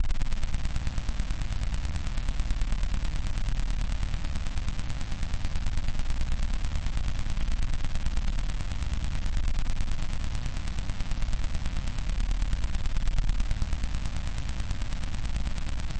Darkness 4/4 120bpm
This is a deep distortion sound design used to accentuate a dark/evil atmosphere meant to sit under other sounds as atmospheric "filler".
sci-fi, dark, atmosphere, drone, processed